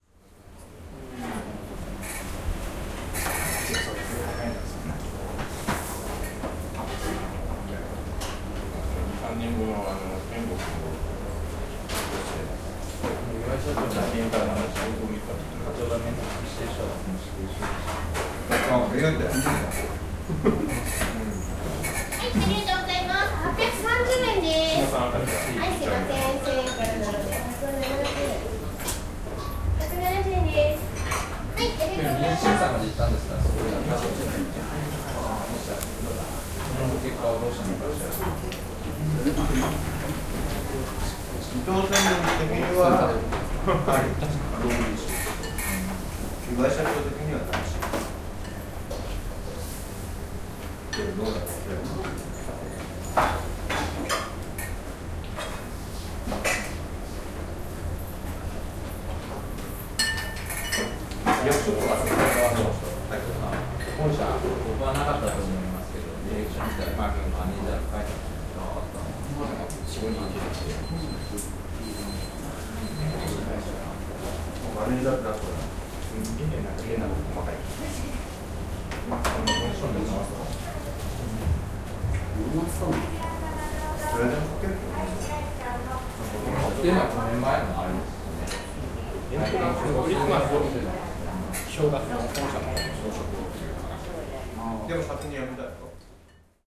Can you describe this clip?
Traditional restaurant. People talking in Japanese. Cutlery. Clock alarm.
20120807